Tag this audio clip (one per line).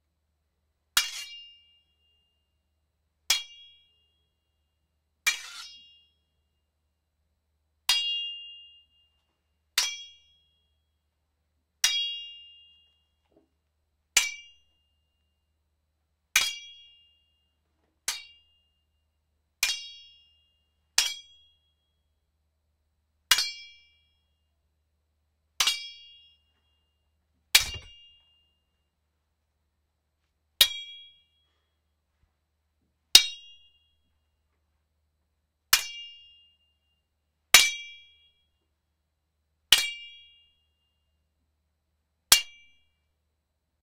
sheathing
sword
raspando
sound
unsheathing
machete
iron
metal
sliding